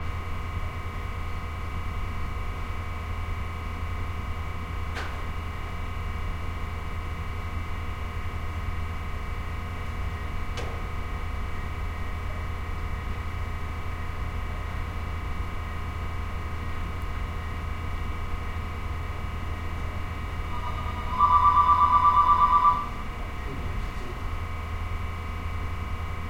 room tone warehouse heavy hum aisle +phone ring nearby echo1
echo, heavy, hum, nearby, phone, ring, room, tone, warehouse